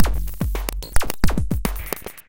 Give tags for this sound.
experimental; glitch-loop

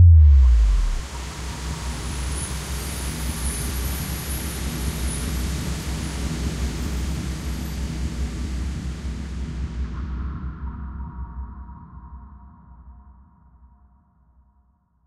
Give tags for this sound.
Drug; Drop; Slow; Wind; High; Chime; Trip; Slo-mo; Motion; Bass

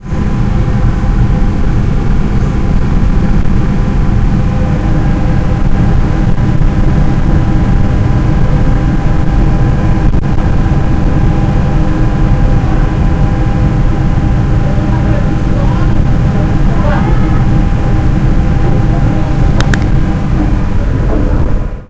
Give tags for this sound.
drone; ferry

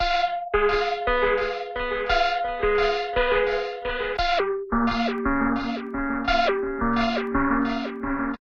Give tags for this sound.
dance; synth

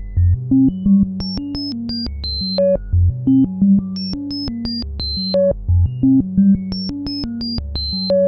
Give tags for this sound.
abstract; digital; electronic; experimental; freaky; future; futuristic; laboratory; sci-fi; sound-design; soundeffect; strange; weird

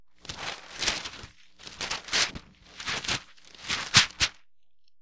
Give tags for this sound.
christmas; newspaper; packing; paper; unwrap; wrapping

